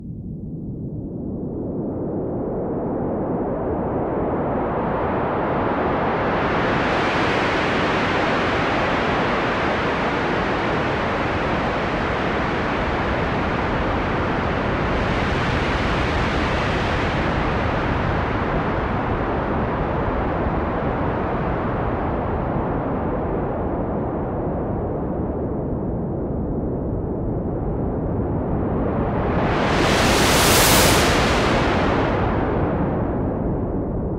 Made with modular synth
analog, experimental, modular